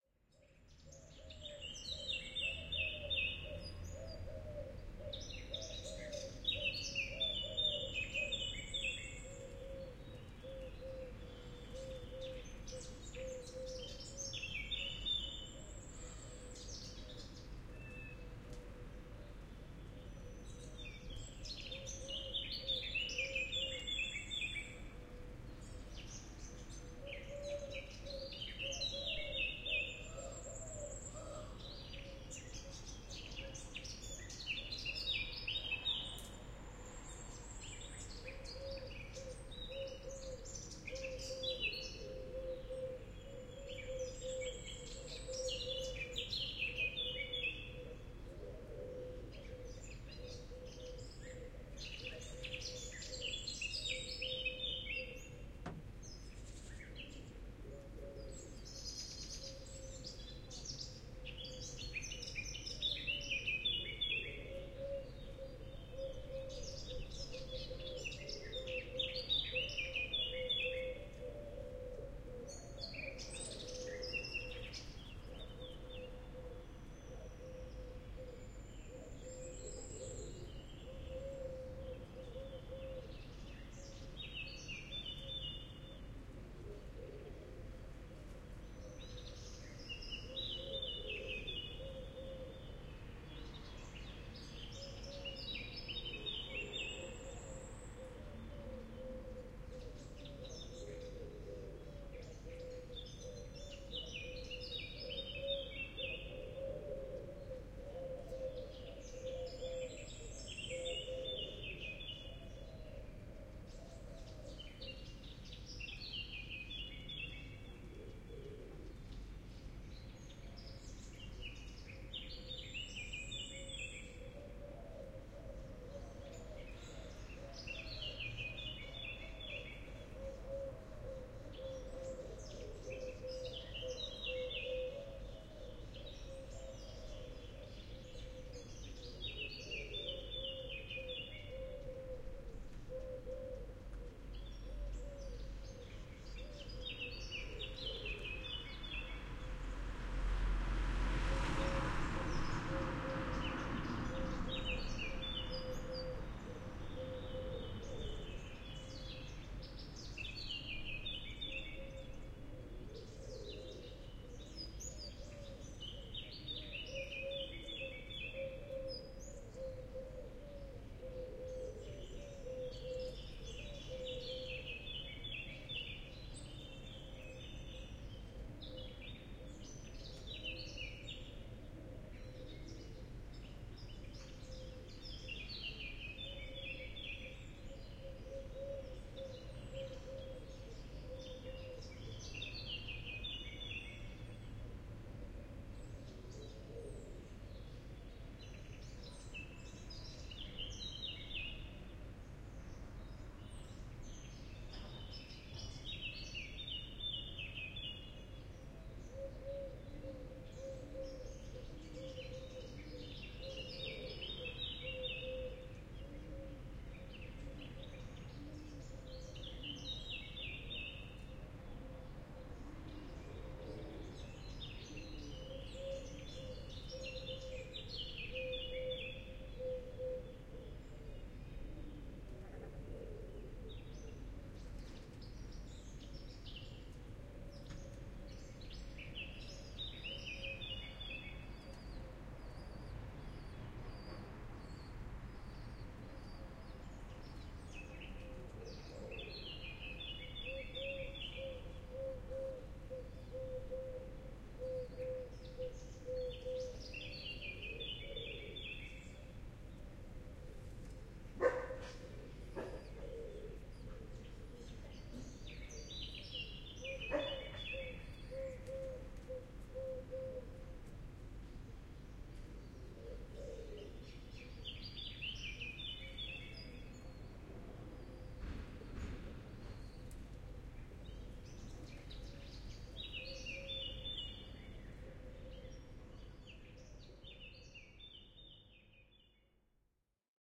R4 00319-3 FR DawnChorus
Spring dawn chorus in Nice city (3rd file).
I made this recording during a warm spring morning at about 6:45AM (just after sunrise), from the 4th floor of a building located in Nice (South-East of France).
One can hear birds chirping, doves warbling and flying, some cars passing by slowly from time to time, and a happy dog at 4’21’’.
Recorded in April 2022 with a Centrance MixerFace R4R and Rode NT5 MP + NT45O capsules in AB position (about 30cm).
Fade in/out applied in Audacity.
barking, birdsong, blackbird, cars, chirping, great-tit, spring, town, tweet